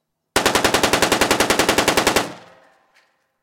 AK47 down the block
Sounds recorded by me for my previous indie film. Weapons are live and firing blanks from different locations as part of the movie making process. Various echoes and other sound qualities reflect where the shooter is compared to the sound recorder. Sounds with street echo are particularly useful in sound design of street shootouts with automatic weapons.
Weapon ID: Russian AKM (Newer model of the AK-47) - 7.62x39mm
Army, Assault, SWAT, gunfire, firefight, AK47